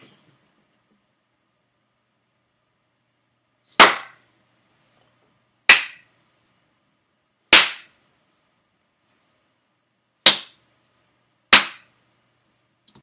A hard jaw jarring face slap I recorded
face, slap, loud, hard